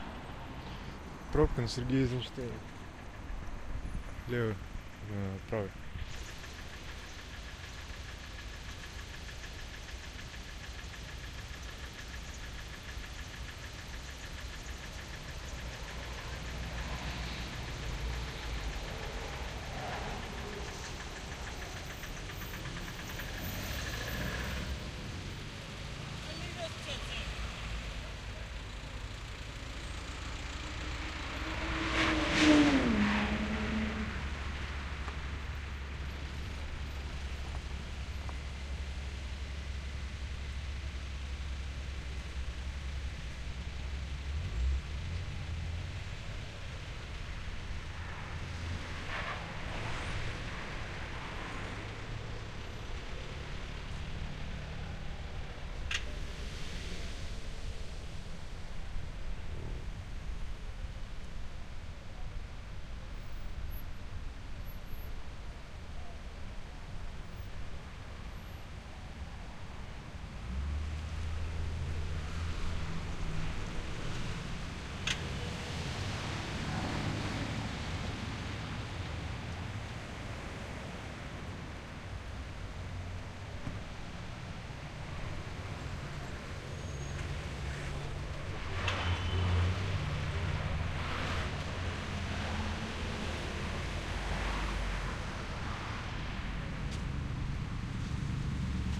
Traffic jam on Sergeya Eizenshteina street. Two C74 mics, Sound Devices 552.
traffic-jam, Sergeya, truck, evening, field-recording, moskow, Eizenshteina